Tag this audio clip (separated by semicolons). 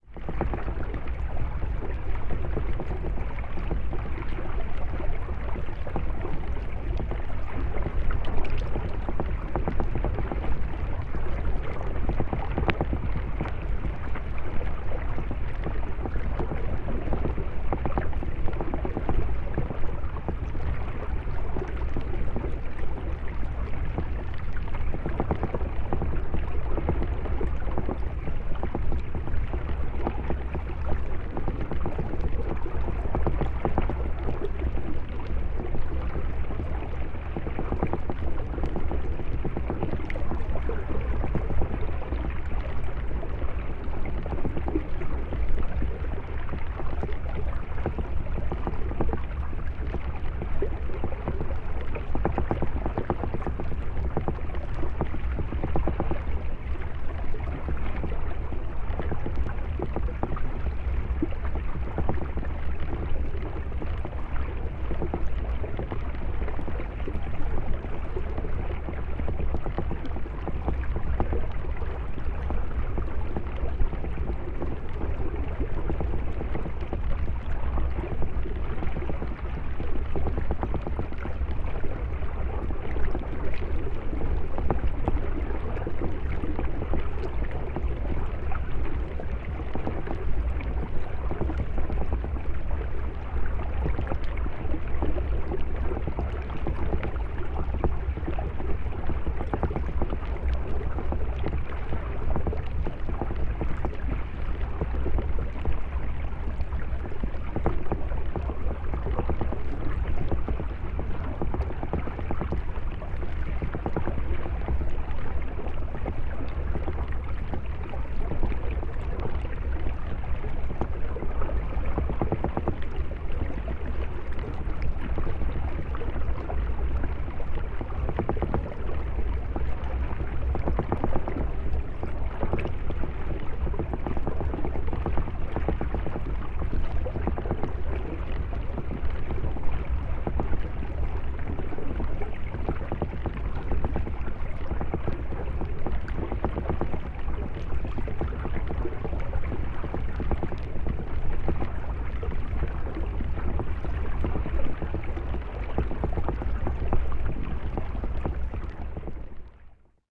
hydrophone-recording; stream; water